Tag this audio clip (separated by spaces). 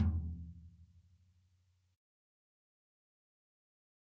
14 14x10 drum drumset heavy metal pack punk raw real realistic tom